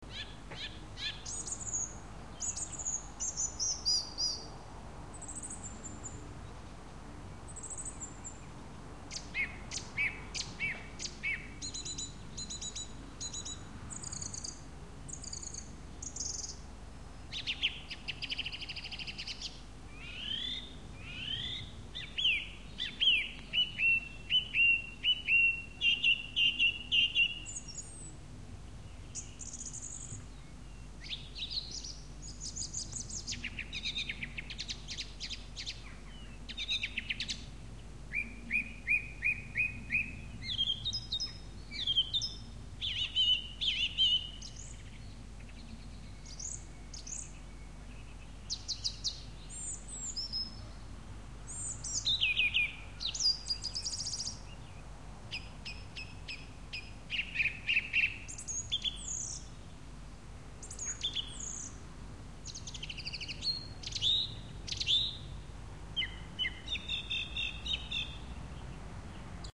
Song thrush singing it's heart out.